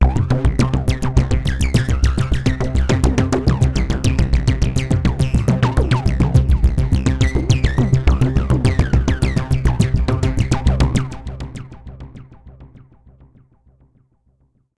130 BPM arpeggiated loop - C2 - variation 3
This is a 130 BPM 6 bar at 4/4 loop from my Q Rack hardware synth. It is part of the "Q multi 005: 130 BPM arpeggiated loop" sample pack. The sound is on the key in the name of the file. I created several variations (1 till 6, to be found in the filename) with various settings for filter type, cutoff and resonance and I played also with the filter & amplitude envelopes.